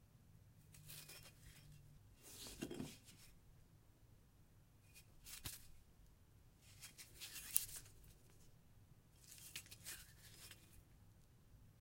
Brick handling sounds
Close mic, turning a brick over with a standard flesh-type hand. Audio raw and unprocessed.